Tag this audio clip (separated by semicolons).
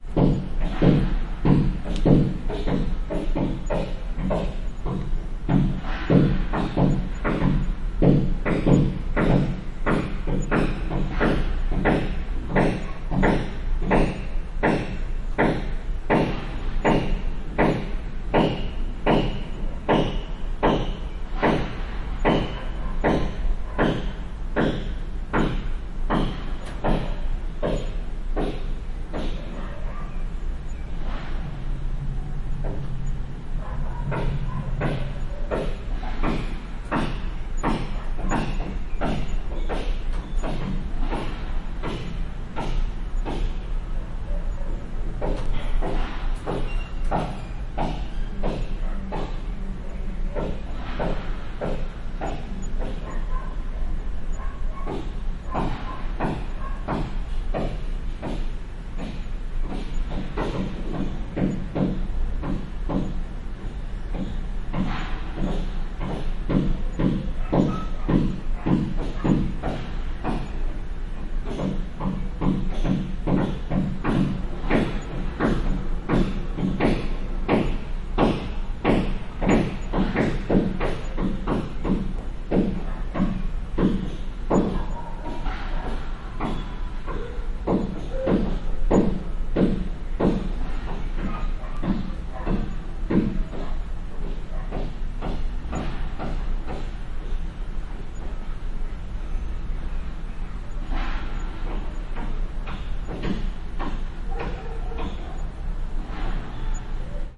demolition; work; house; building; construction; hammering